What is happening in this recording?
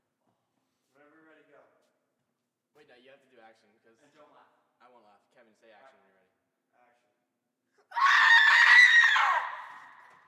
Girl Scream in Soundstage
Girl screams in a soundstage, sorry for extra space in the beginning.
film, soundstage, horror, h4n, building, foaly, Girl, Seinheiser, movie, scared, scary, scream